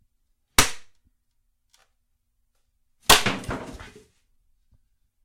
Foam Smash
A large piece of foam being smashed.